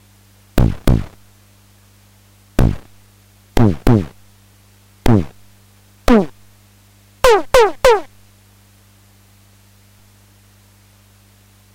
synth tom 2 setting on Yamaha PSS170, this is a high sound
80s, drum, portasound, pss170, retro, synth, tom, yamaha